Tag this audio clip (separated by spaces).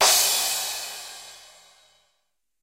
acoustic stereo rick drum